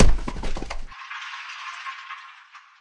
metal collision
An impact or crash into metal
crash, metal, rubble